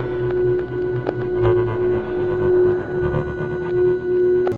humming tones and static backround sounds